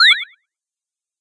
instant teleport
Very brief sound effect, yet another attempt at making an "Astroboy"-style sound effect - kind of like a character in a film being instantly zapped from one place to another.
science-fiction, sci-fi, echo, space